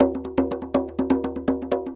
tambour djembe in french, recording for training rhythmic sample base music.
djembe, drum, loop